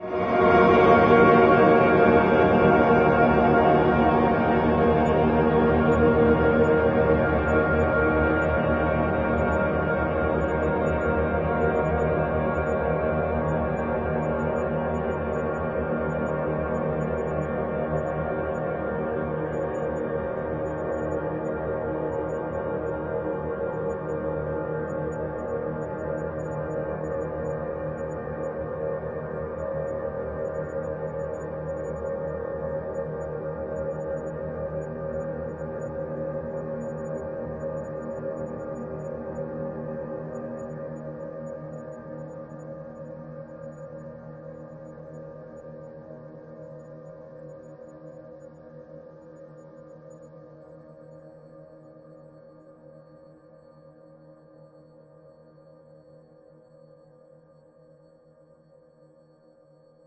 Minor9Strike
A stretched and reverberated version of the final Minor 9 chord of my song for solo piano, titled Fate.
It had no purpose just sitting in my audio folder so I decided to upload it here. Enjoy!
chord, horror, minor, piano, reverberant, reverberated, spooky, stretch, sustain, tension